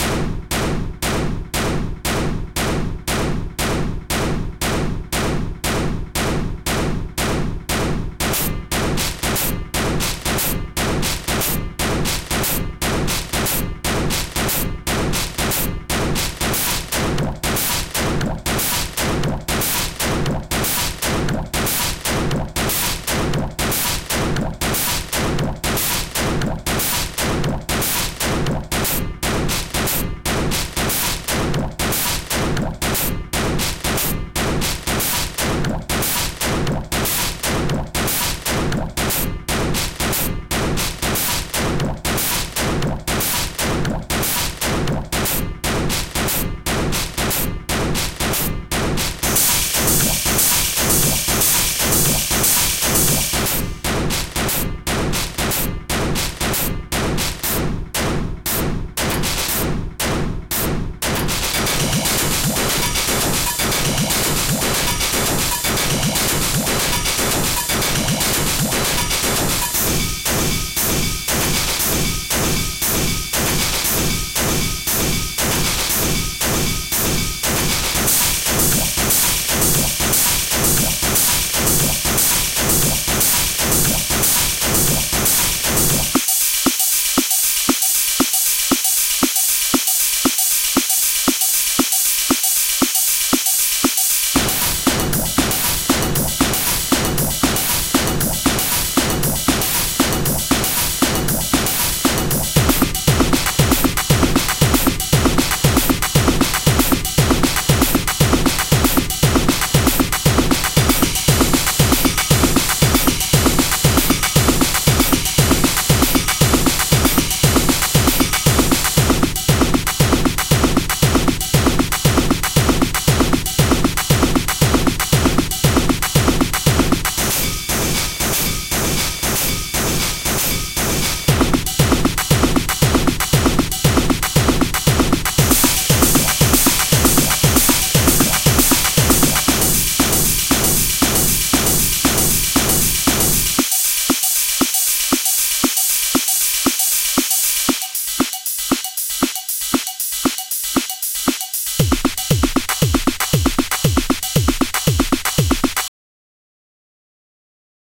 Evil Kitchen
Something I made using Caustic 3 for Android.
evil, kitchen, noise, sink, splatter, water